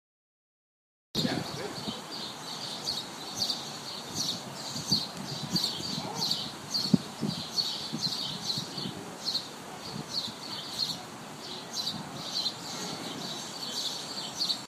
Birds (at Cloisters NYC )
This sound was recorded at The CLoisters museum in New York City.